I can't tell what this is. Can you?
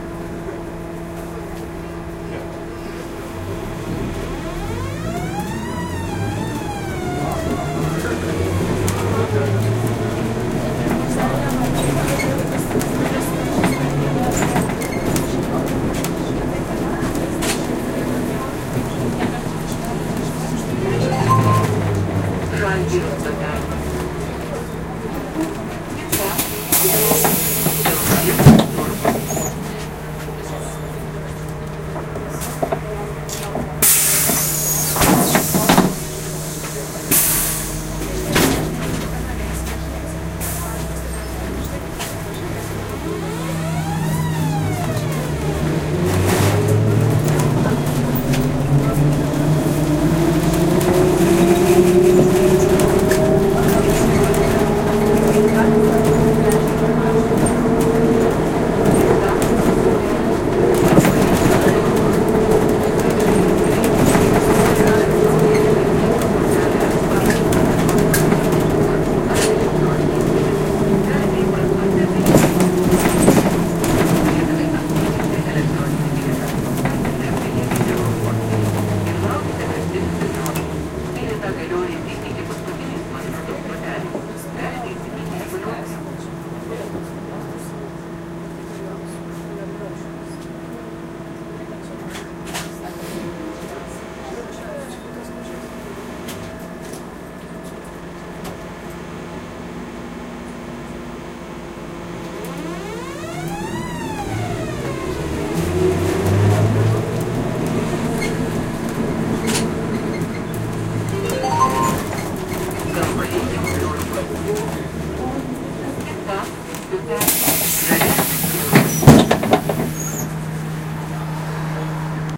short trip in a trolleybus 2
city-transport, ride, trolleybus